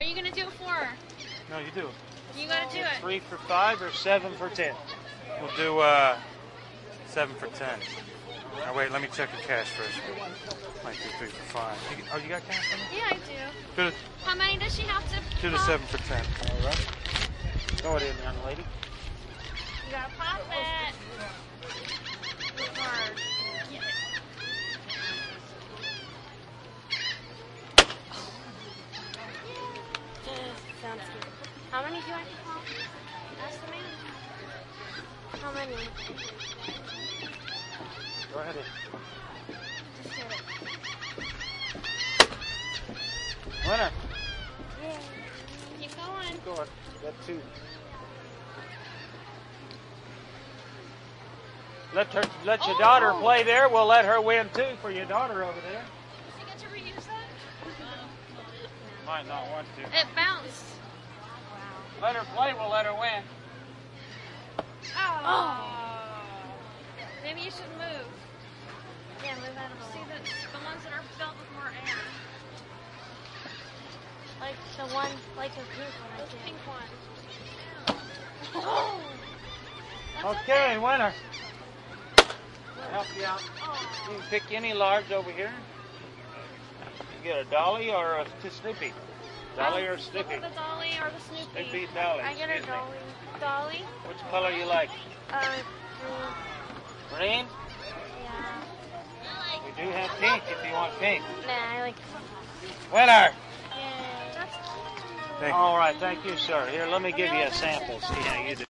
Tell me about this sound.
newjersey AC boardwalk game2
Atlantic City Boardwalk "pop the balloon with a dart" game recorded with DS-40 and edited in Wavosaur.